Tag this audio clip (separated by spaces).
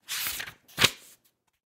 turn sheet paper movement